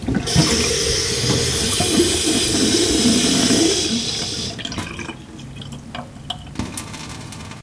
running water tap
Opening a tap, water running into a steel kitchen sink, then dripping a little while. Recorded with the Zoom H2 Handy Recorder, normalized with Audacity.
dripping, water, kitchen, tap, sink